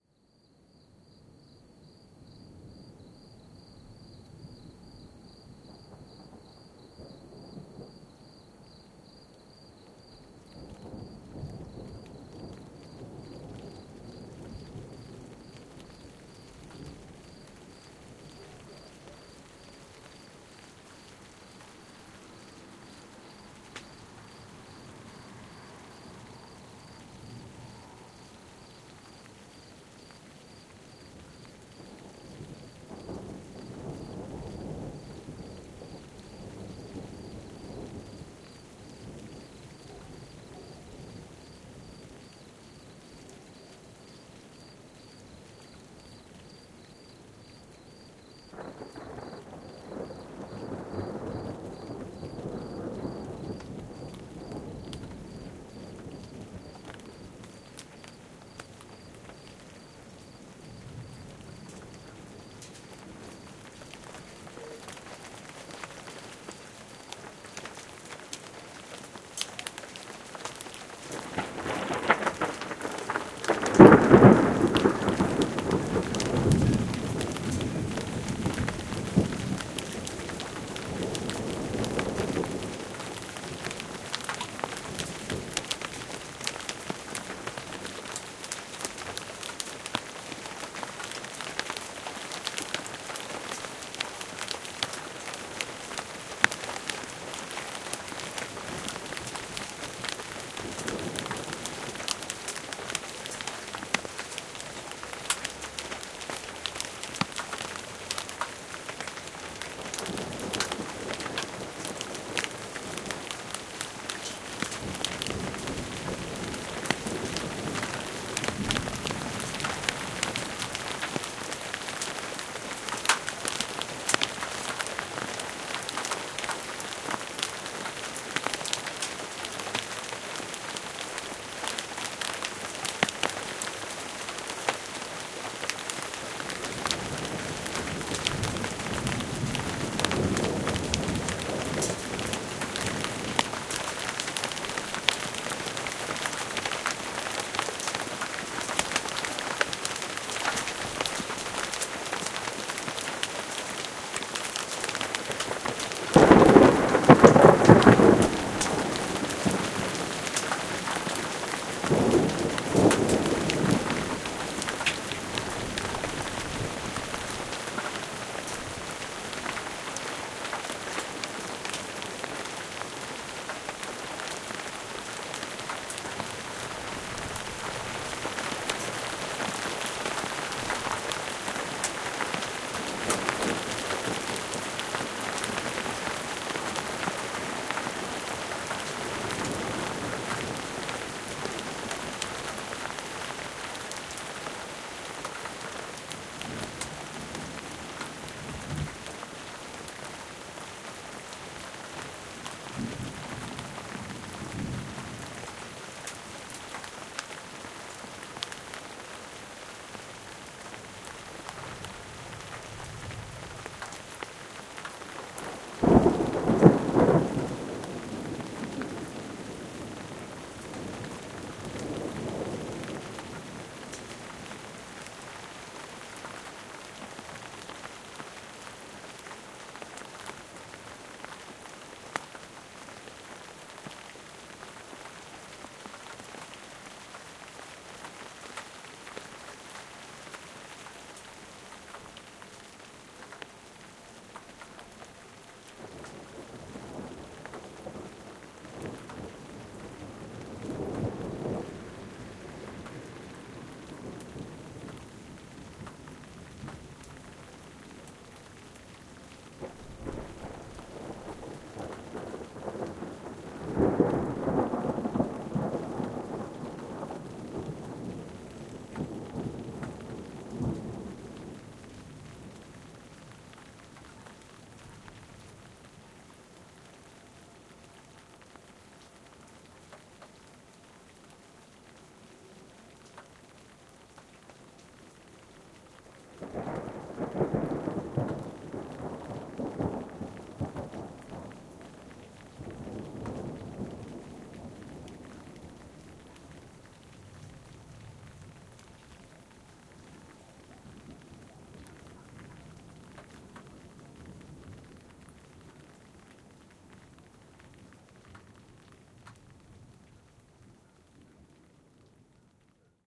First thunderstorm arrived with hail in 20th of May 2015, in the evening Pécel, Hungary. Recorded by SONY ICD-UX512.